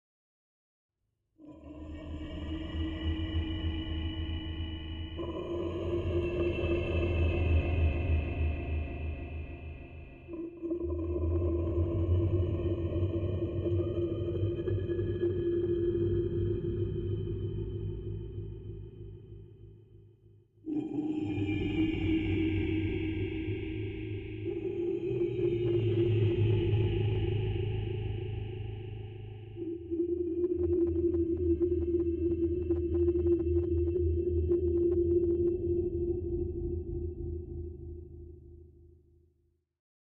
stone singing sample3
stone-sample (see the stone_on_stone sample pack) played through a FOF-synthesis patch in Max/MSp, using IRCAM vowel-resonator parameters, thus making the stone 'sing'
singing, stone, fx, processed